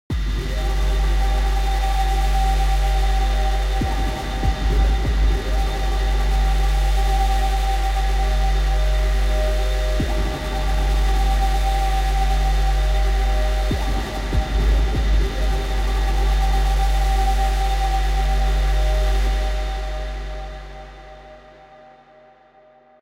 metal pads
Synthesized droning bass / pad.
Made with Native Instrument Massive.
ambient, artificial, bass, distorted, drone, pad, space, synthesized